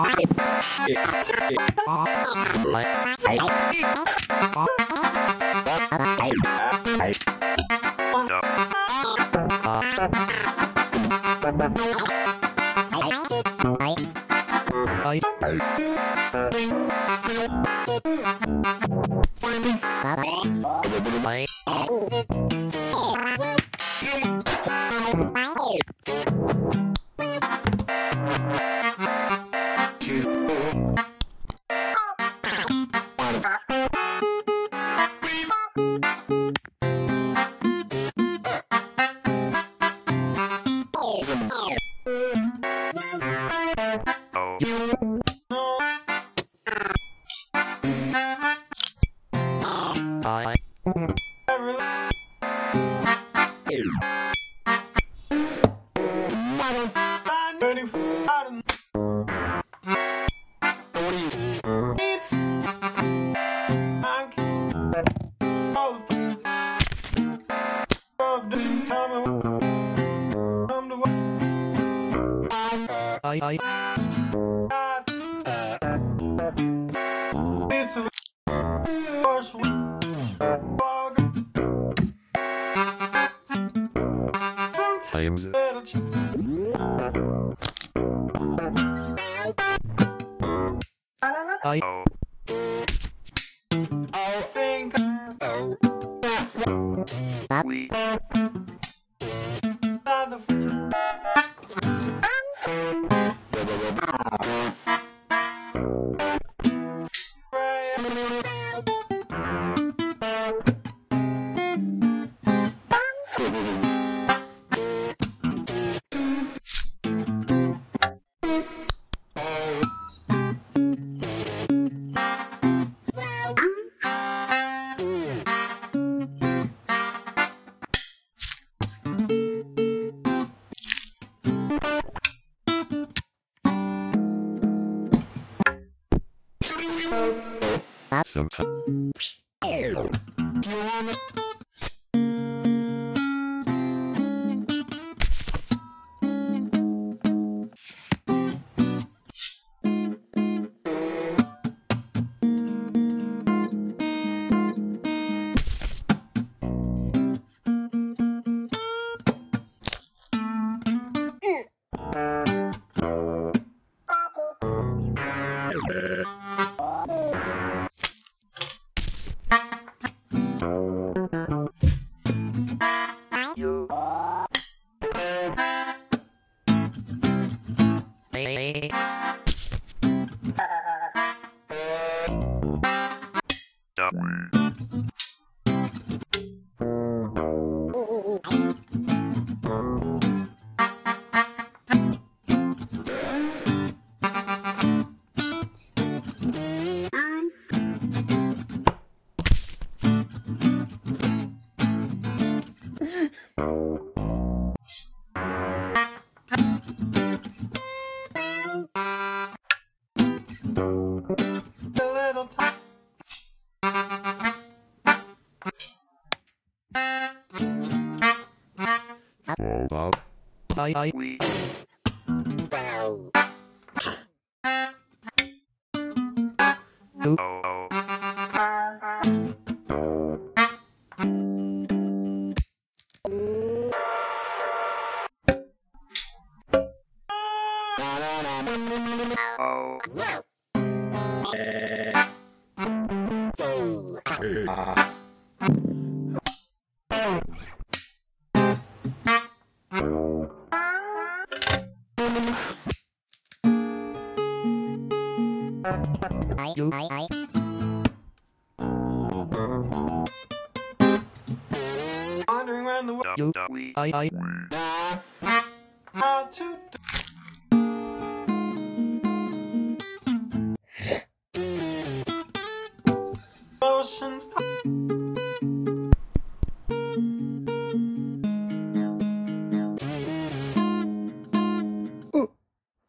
Home Recipe Collider 715
Chopped, levelled and ordered by length to make this mishmash of sound. Would love to hear if you use any of it in a beat!
Much love,